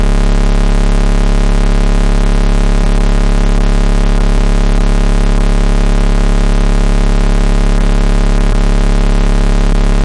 These clips are buzzing type audio noise.
Various rhythmic attributes are used to make them unique and original.
Square and Triangle filters were used to create all of the Buzz!
Get a BUZZ!
Artificial, Buzz, Buzzing, Factory, Industrial, Machine, Machinery, Noise